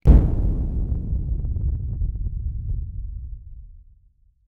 A short explosion effect made with my mouth close to a microphone.
blowing, boom, explosion, ignition, mouth, noise, rumble, thunder, up, white